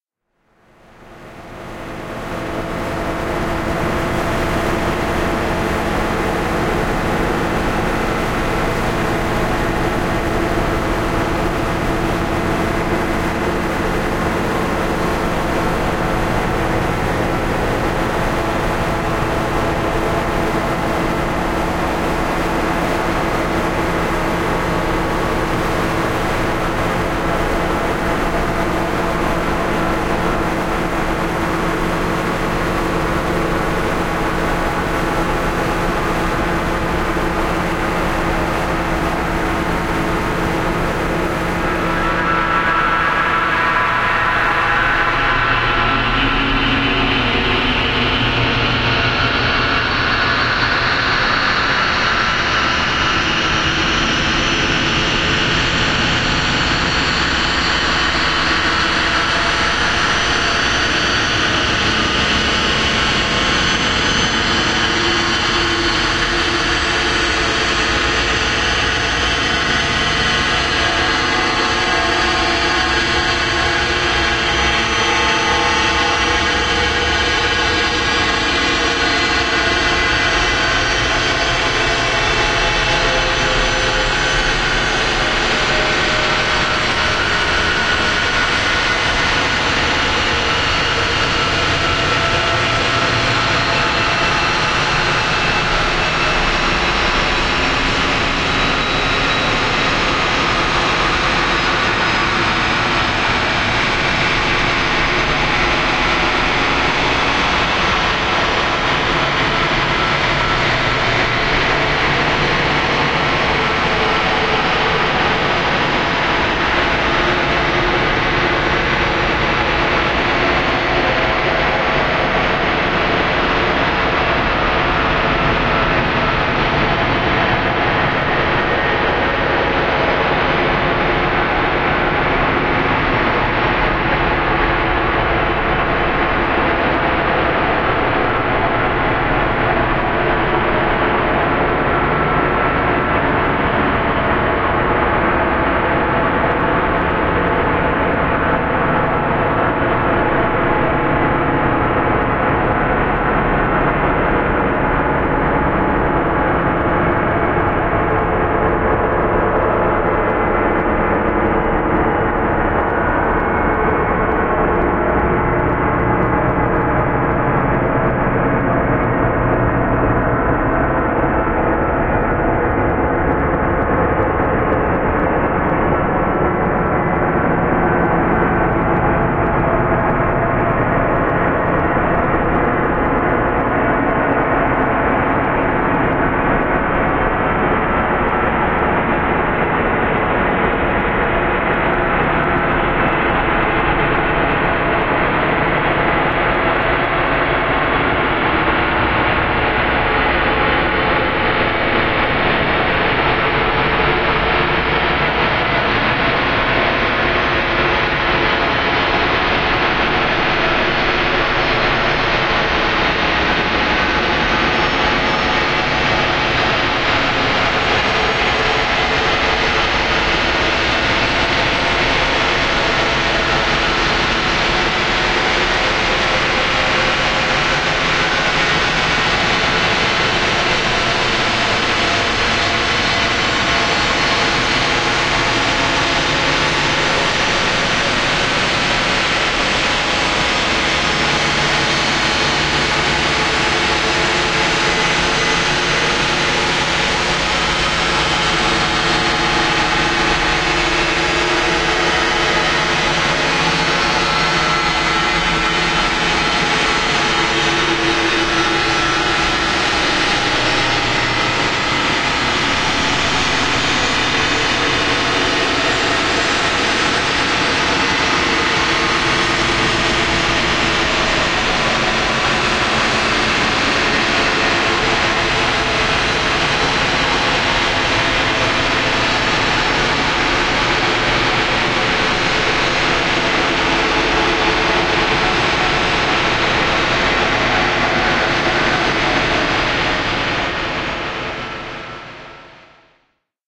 Feedback patch made in pure data. A bit of an industrial type soundscape. Eerie
Terugkoppelings schema gemaakt in pure data. Een industrieel klinkend soundscape die langzaam van klank verandert. Een beetje eng.
ambience, eerie, mechanical, pure-data, scape, synth, synthetic